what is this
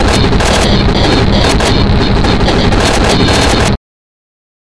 This noise sound is made by only LMMS.